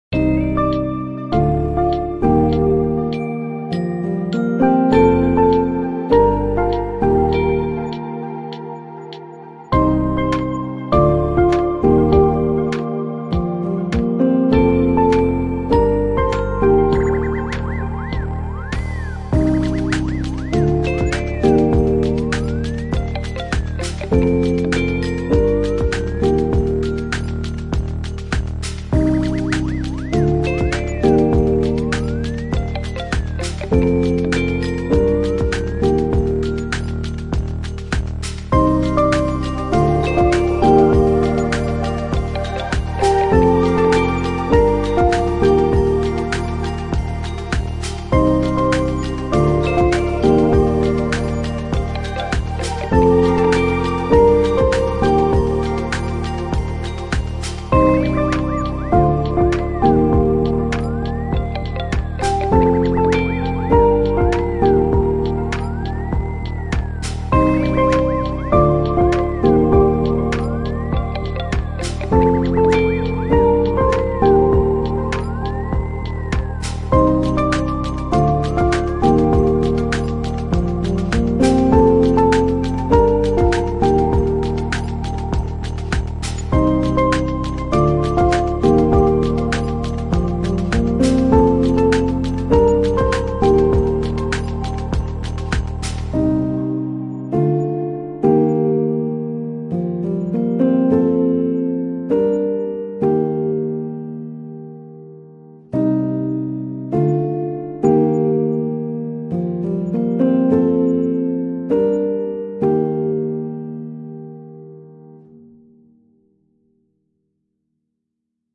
April Showers: Sweet Lo-Fi Piano Vibes
"April Showers" is a lo-fi piano track inspired by the soothing rhythm of a late-afternoon rain shower in early April. Picture yourself sitting in a cozy coffee shop, the soft patter of rain blending with the gentle hum of life outside. This track captures those quiet, reflective moments that remind us of the beauty in everyday life.
Whether you’re crafting a reflective vlog, setting a serene mood in your podcast, or looking for the right sound to accompany a contemplative scene, "April Showers" brings a heartfelt touch to your work.
Thank you for listening.
ABOUT THIS RELEASE:
USAGE RIGHTS AND LIMITATIONS
ABOUT THE ARTIST:
Creatively influenced by the likes of Vangelis, Jean Michel Jarre, KOTO, Laserdance, and Røyksopp, Tangerine Dream and Kraftwerk to name a few.
USAGE RIGHTS AND LIMITATIONS:
Thank you for your cooperation.
Take care and enjoy this composition!
ambient, atmosphere, audiolibrary, background, calm, chill, cinematic, cozy, dramatic, film, inspiring, instrumental, intro, introspective, lo-fi, movie, music, outro, piano, podcast, podcast-music, positive, relaxing, repetition, slow, soft, sweet, synth, vlog, vlogmusic